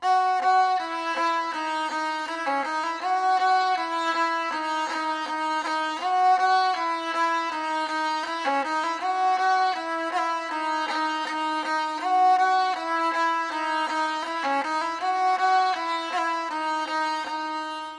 Sarangi is a traditional instrument held upright and bowed across. Ambient/Sympathetic Stings to give a natural reverb.
From the Dhol Foundation Archive - Enjoy
Bowed, Tuned, Sarangi, Violin, Indian, Skin, String, Bow